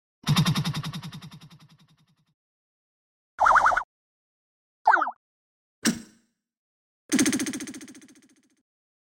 Cartoonish Dynamics
dynamics, noise, cartoon, cute, hits